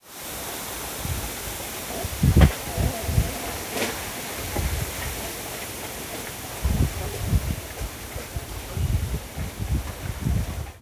Wind and Bamboo Trees 2
Sound of wind and bamboo trees recorded with my phone.
wind, trees, nature